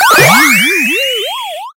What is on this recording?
SFX Powerup 32
8-bit retro chipsound chip 8bit chiptune powerup video-game
8-bit, chipsound